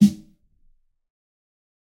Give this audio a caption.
fat snare of god 008
This is a realistic snare I've made mixing various sounds. This time it sounds fatter
drum, fat, god, kit, realistic, snare